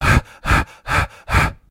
Breath Gasp Loop
Breath recorded for multimedia project